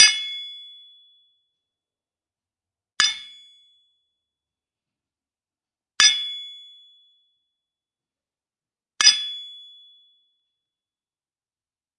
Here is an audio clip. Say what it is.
Anvil - Lokomo 125 kg - Hammer on 6mm steel 4 times
6mm steel plate hit with a hammer four times on a Lokomo 125 kg anvil.
iron, impact, tools, 4bar, labor, smithy, metalwork, work, 80bpm, steel, anvil, metal-on-metal, lokomo, forging, blacksmith, crafts, clashing, metallic, loop